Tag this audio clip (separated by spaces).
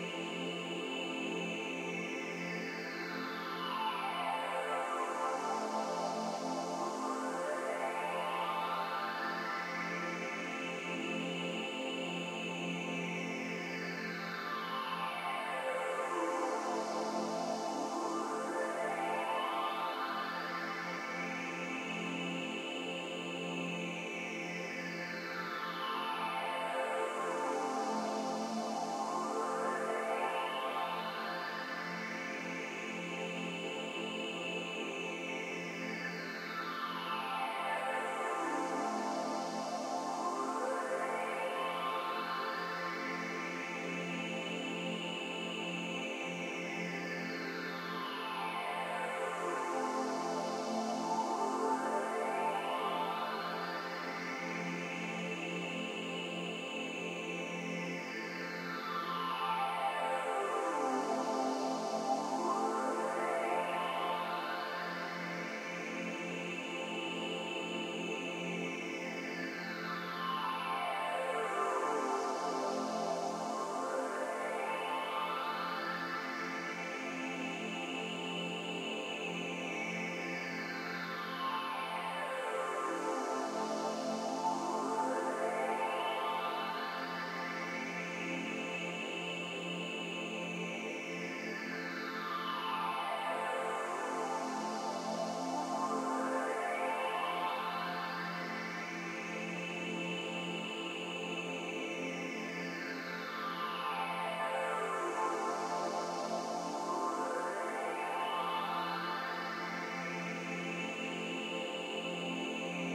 ambient sample pad